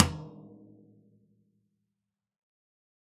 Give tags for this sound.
multisample; tom

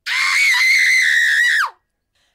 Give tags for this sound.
666moviescreams; asustada; Cridant; Crit; Dona; Ensurt; Espantada; Frightened; Gritando; Grito; Horror; Mujer; Scream; Screaming; Susto; Woman

